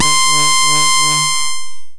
Basic impulse wave 1 C6
This sample is part of the "Basic impulse wave 1" sample pack. It is a
multisample to import into your favourite sampler. It is a basic
impulse waveform with some strange aliasing effects in the higher
frequencies. In the sample pack there are 16 samples evenly spread
across 5 octaves (C1 till C6). The note in the sample name (C, E or G#)
doesindicate the pitch of the sound. The sound was created with a
Theremin emulation ensemble from the user library of Reaktor. After that normalising and fades were applied within Cubase SX.